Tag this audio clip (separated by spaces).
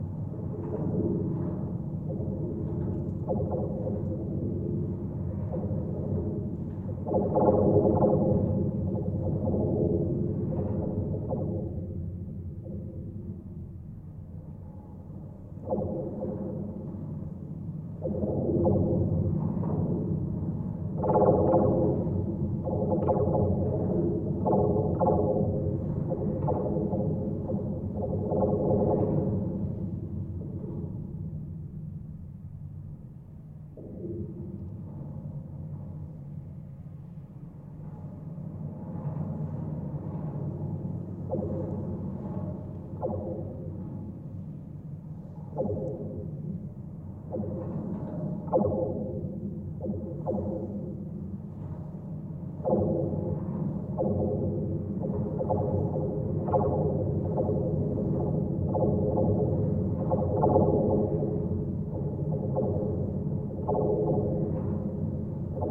Golden-Gate-Bridge wikiGong contact-mic Schertler field-recording Marin-County DYN-E-SET San-Francisco steel